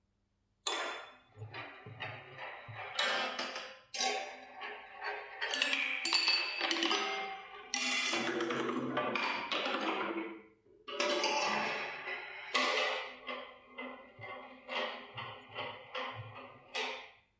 Kinetic Structure 1
Sounds of balls on a kinetic structure created By Mr "Legros" and his son
Recorded with a Zoom H2N on XY directivity
psychedelic, kinetic, pro, bizarre, design, enormous, structure, technica, impact, metal, gravity